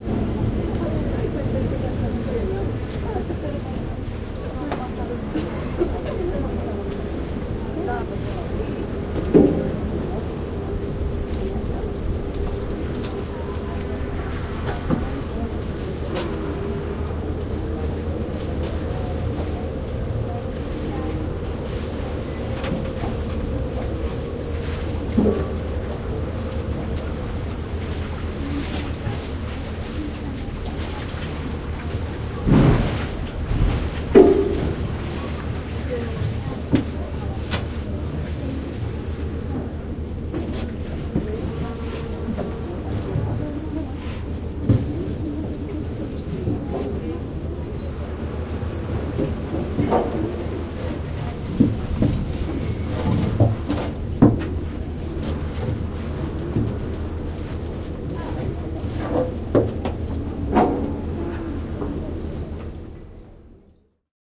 BOAT INTERIOR ARRIVING
interior of Portuguese boat